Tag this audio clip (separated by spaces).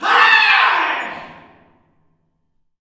agony bronius cry dungeon fear human jorick male pain reverb schrill screak scream screech shriek squall squeal torment yell